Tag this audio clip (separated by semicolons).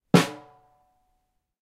drum; percussion; drums; drumstick; hit; hits; snare